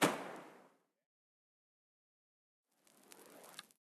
Burning arrow 05
Recording of a fire arrow being shot.